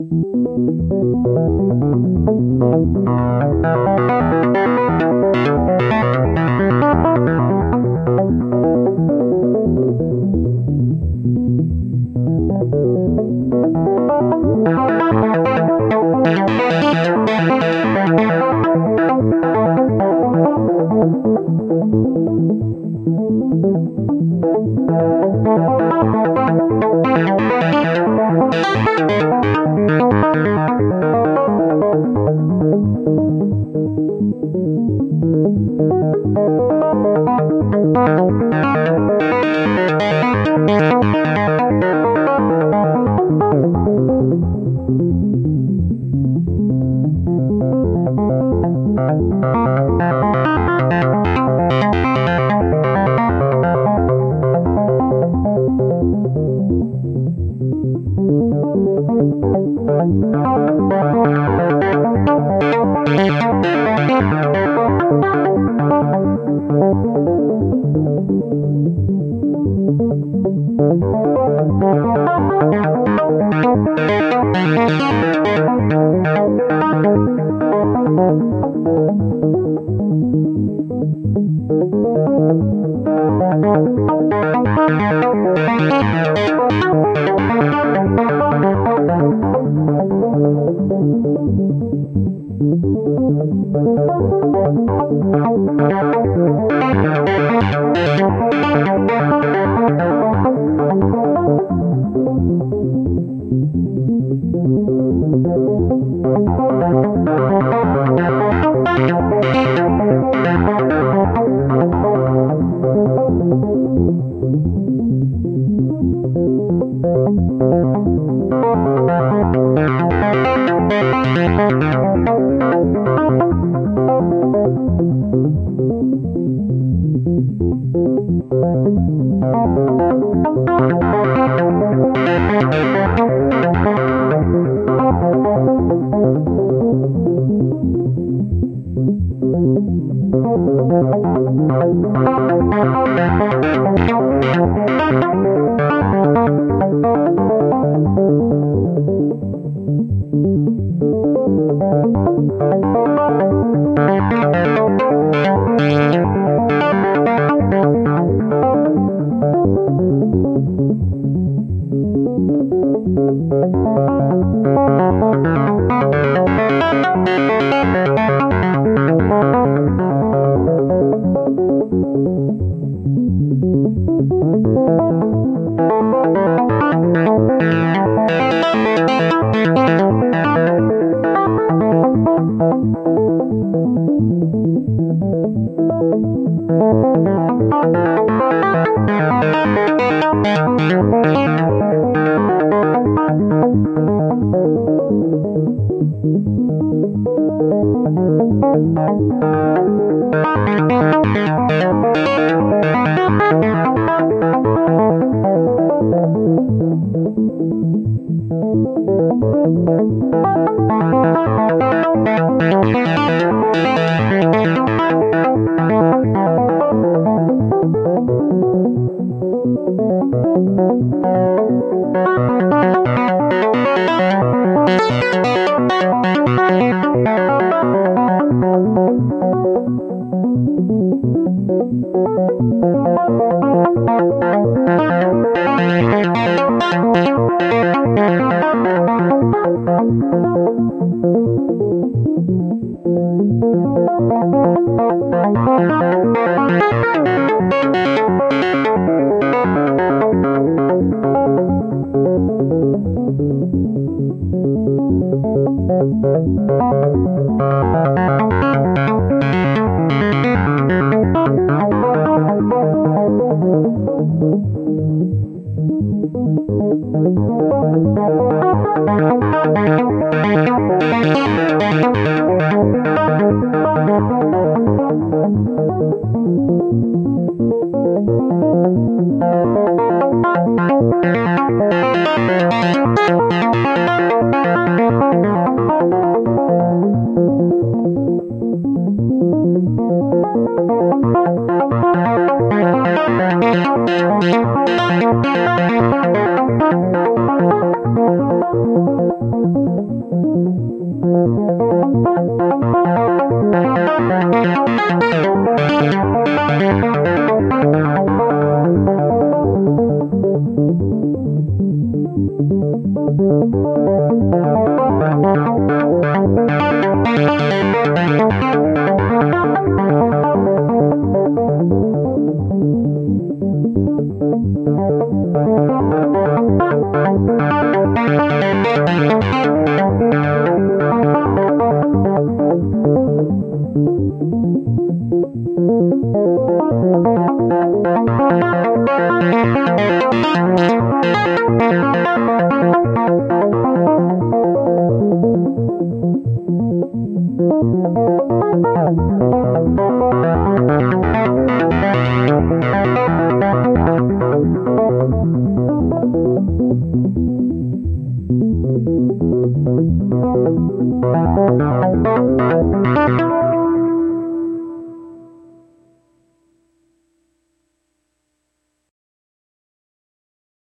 Semi-generative analog synth sequence (with delay) in random keys.
One of a set (a - h)
Matriarch self-patched & sequenced by Noodlebox
minimal post-processing in Live
132bpm, arp, electronic, loop, melody, modular, psychedelic, sequence, stereo, synth, synthesizer, techno, trance